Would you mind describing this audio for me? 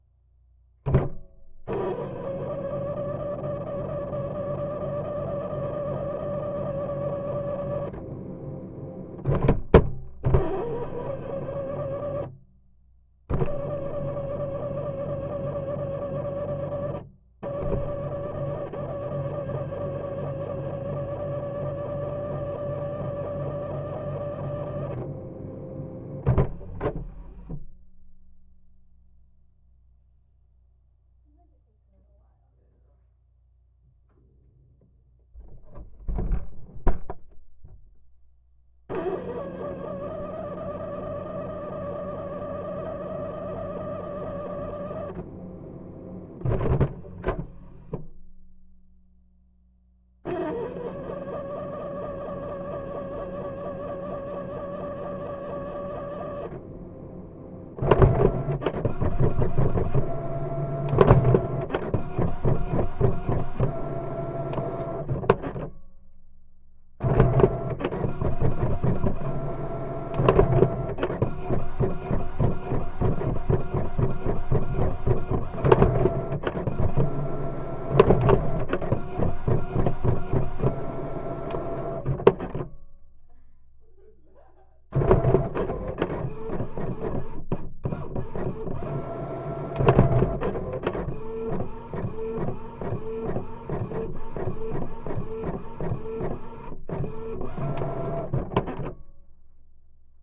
My sick Canon ink jet printer makes some sounds and eventually prints some pages. Recorded with a Cold Gold contact mic taped to the plastic case into a Zoom H4.